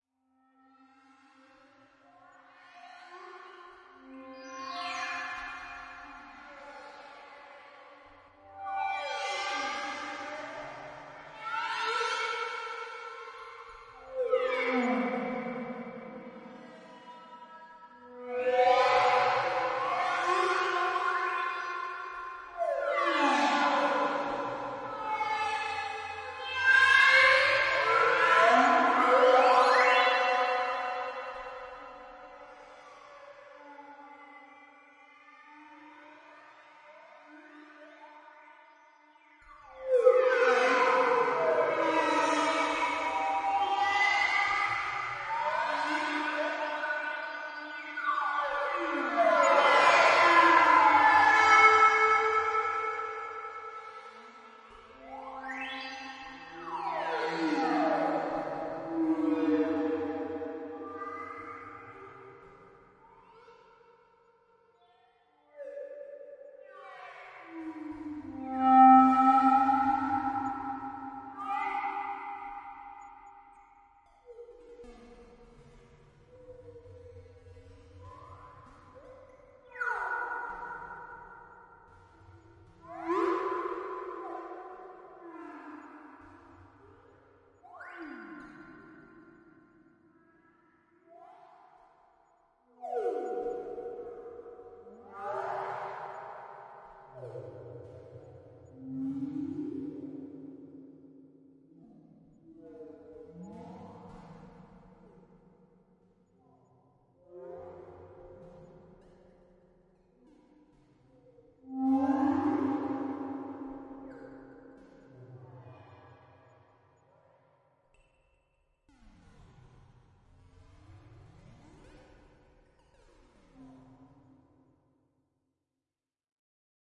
Digital Whales?
A couple of minutes of me mucking about with a synth trying to make it sound like whalesong.
delay, digital, echo, reverb, sine, synth, synthesis, synthesiser, whale, whalesong